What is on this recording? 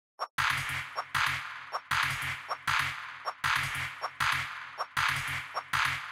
percussion cut from my latest hard trance track